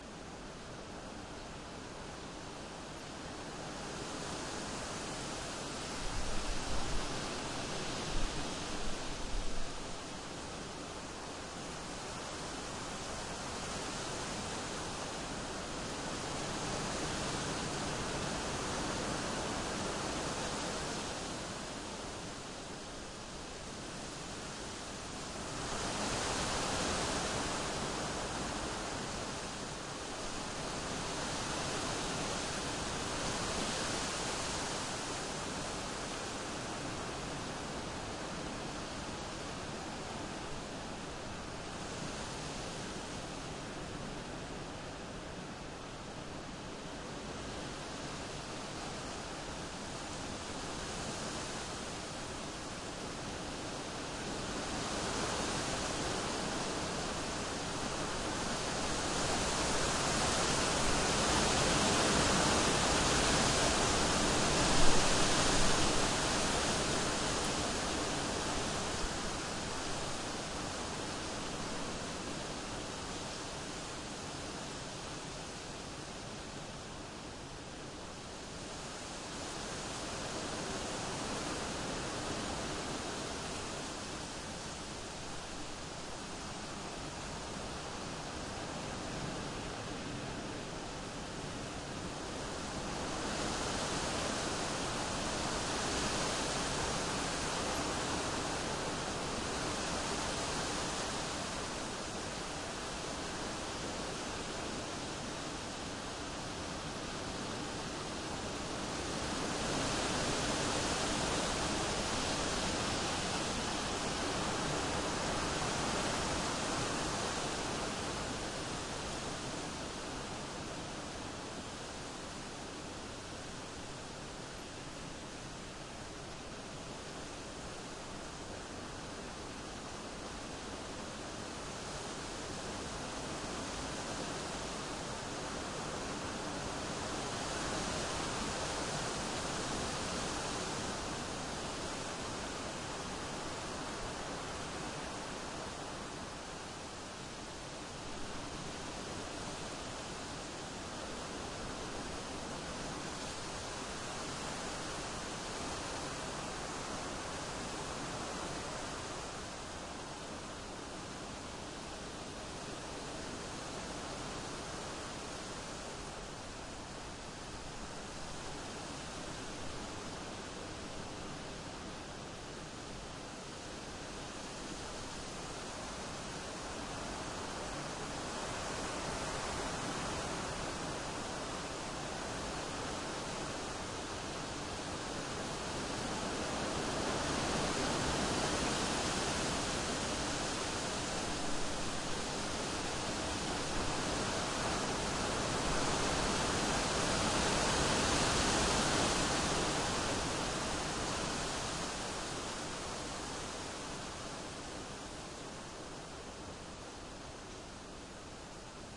Some strong wind recorded in Esbjerg / Denmark in October 2010, using a Sony PCM-D50 recorder with a rycote windshield. It gave the trees a lot to cope with.
denmark, field-recording, gale, strom, trees, wind